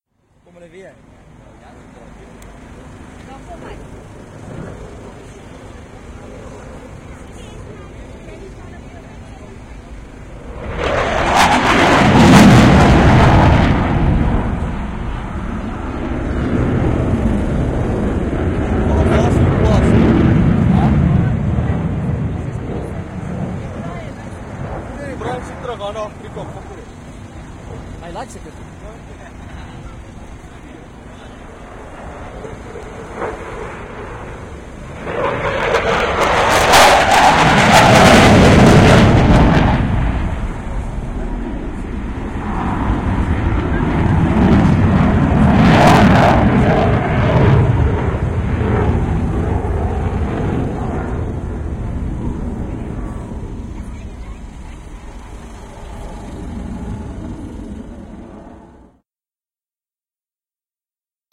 Gripen flypast5
Fly, Past